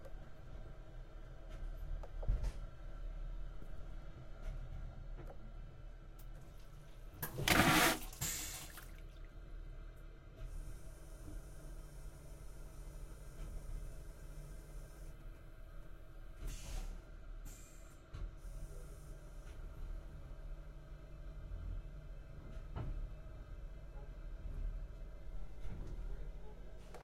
train, toilet drain, Moscow to Voronezh
Toilet drain in a wagon
train, toilet, rail, wagon, railway, drain, passenger-wagon